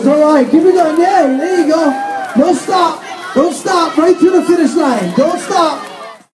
A guy yelling "Alright give them a hand, ladies and gentlemen! Don't stop! Don't stop! Right to the finish line! Don't stop!" with crowds cheering in the background. Recorded at Neil Blaisdell Center, during a run. The clip is faded out, and taken from a recording of the race done by me on my iPhone using Voice Memos.